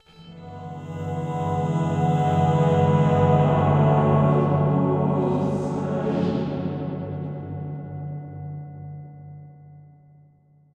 Angelic voices, strings, and synthesised sounds morphed together to produce a strange plaintive sound.I made the sounds in this pack as ethereal atmospheres/backgrounds/intros/fills. Part of my Atmospheres and Soundscapes pack which consists of sounds designed for use in music projects or as backgrounds intros and soundscapes for film and games.